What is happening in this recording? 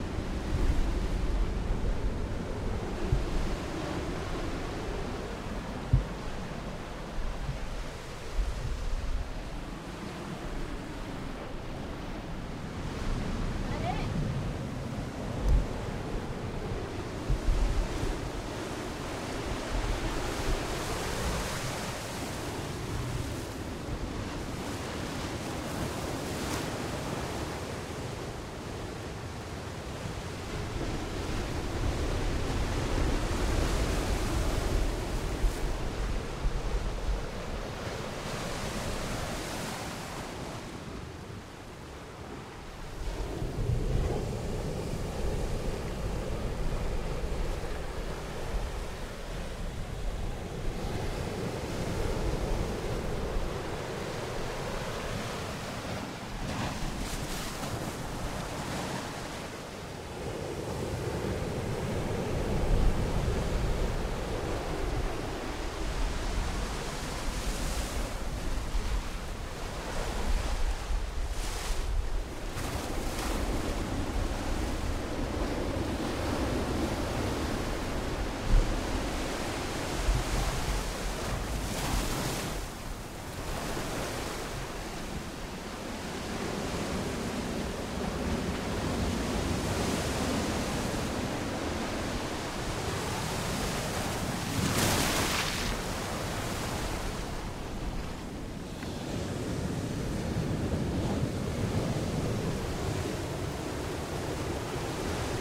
Rocky Surf 7 161205 mono
Waves, distant. Some chatter. - recorded on 5 Dec 2016 at 1000 Steps Beach, CA, USA. - Recorded using this microphone & recorder: Sennheiser MKH 416 mic, Zoom H4 recorder; Light editing done in ProTools.
field-recording, ocean, waves